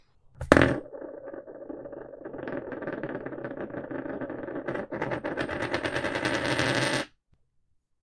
Coin Spin - 5
money, pay, payment, buy, ding, metal, falling, shop, drop, move, finance, coins, coin, dropping, spinning, spin